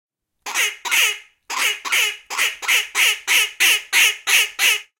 Sound of female duck made with bird call (decoy). Sound recorded with a ZOOM H4N Pro.
Son d’une cane fait avec un appeau. Son enregistré avec un ZOOM H4N Pro.